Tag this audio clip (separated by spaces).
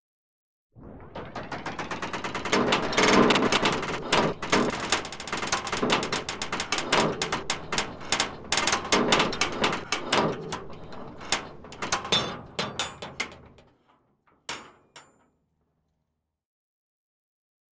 play kids cement mertal large fun